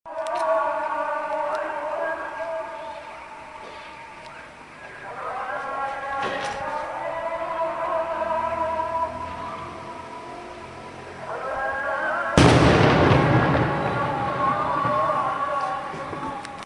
Ramazan topu
special muslim's pray month ramadan, sound cannon being shot when sun goes down and azan begin